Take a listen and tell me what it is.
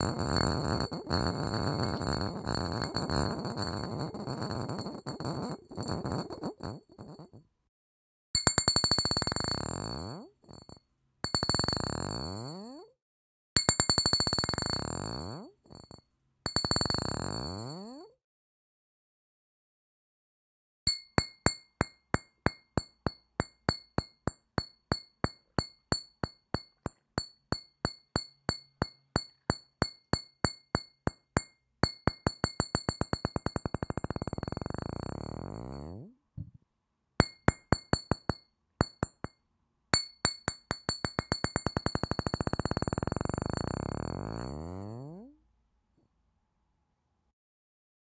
another bottle rec
percussivesounds, experimenting, bottles